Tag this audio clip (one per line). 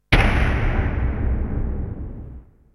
flash
industrial
low